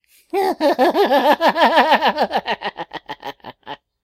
Evil Laugh 5
crazy, demented, evil, insane, laugh, laughter, mad, male, psychotic